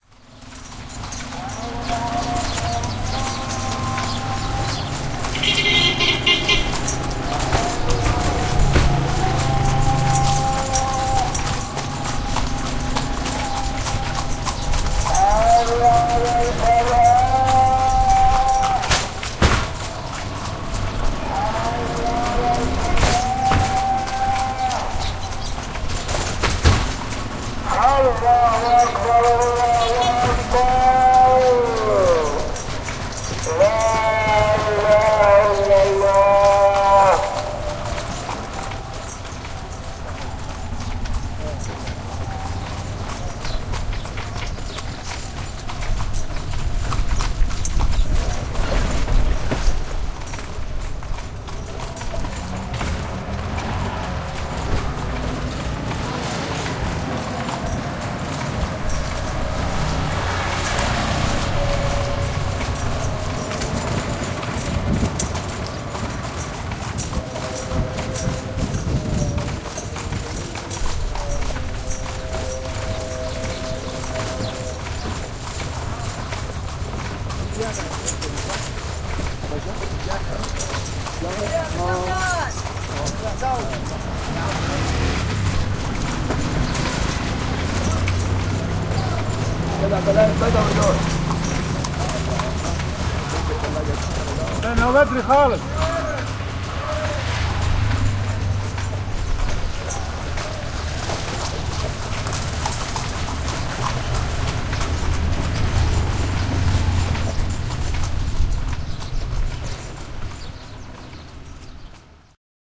Horse Carriage Through Edfu Egypt
Horse carriage ride through streets of Edfu Egypt
carriage, coach, ambient, ride, temple, soundscape, Nile, city, streets, field-recording, crowd, ambience, traffic, equine, atmosphere, horses, clip, ambiance, hooves, Egypt, Arabic, general-noise, clop, urban, horse, buggy, street, Edfu, people, noise